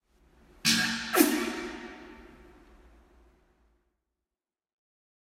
Fatal Fart
Real farts with some natural reverb. Recorded with a fucked up iPhone 7 in a disgusting screwed up pub. As always I was dead drunk and farted away on the lovely toilets there.
pub, fart, fun, ambient, human, flatulation, drunk, stink, beer, male, wow, disgusting, reverb, voice